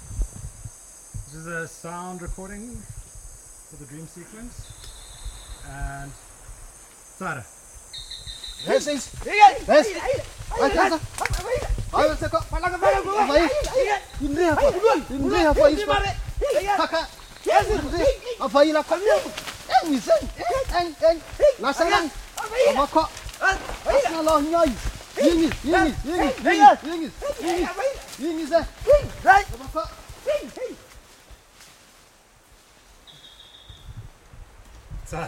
This is a sound recording for a dream/chase sequence through the forest. There are three men running and shouting in Madagascar.